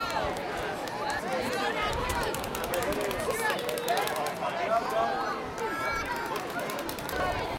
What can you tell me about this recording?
Crowd noise at parade
Generic crowd chatter at a St. Patrick's Day parade
chat, people, crowd, parade, ambient, field-recording